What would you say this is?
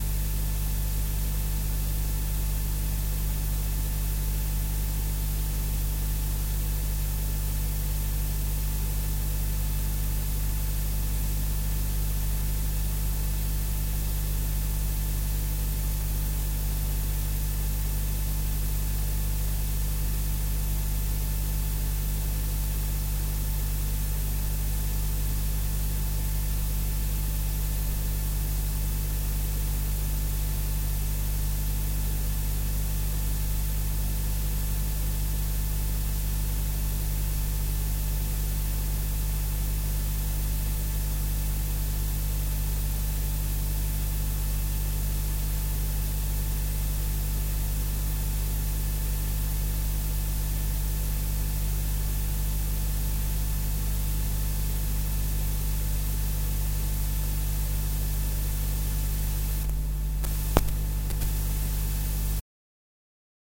Cassette tape noise recorded by playing empty cassette. Routed thru a Summit Audio 2BA-221 preamp . No processing. Played on a Sonab C500.
<3